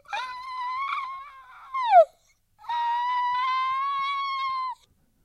Making squeaky noise with my throat. Recorded on (stationary) MiniDisk. Microphone: Dynamic Ramsa WM-V001E. No Reverb.
recording, squeaks